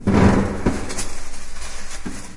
This sound was recorded in the bathroom of Campus Poblenou.
We can percieve the sound of taking paper
bathroom, UPF-CS14, paper